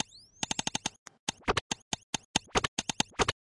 flash beat
2 measures at 140 bpm.
beat, flash, camera, loop